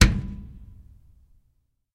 dryer door 02
This is the door of a clothes dryer being closed.
bass, clothes, door, drum, dryer, hit, kick